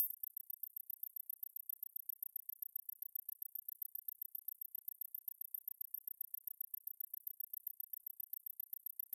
very high frequencies bleeping, was done with a virtual synth called Evol. It work even if pitched down a lot (like -30 semitones for example)